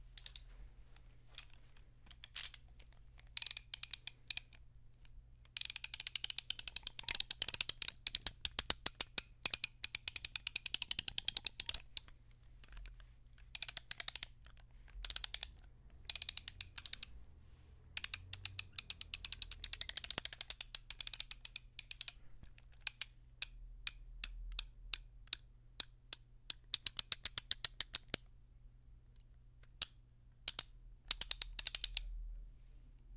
Ratchet being handled, recorded with a neumann tlm103
metal, sound, studio